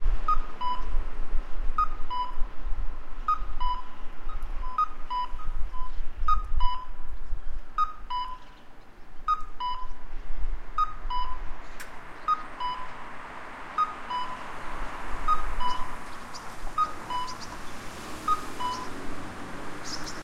pedestrian crossing signal in miyoshi city, tokushima, japan